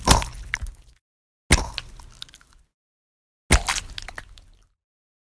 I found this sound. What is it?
Meat drop
blood, gore, hit, hurt, meat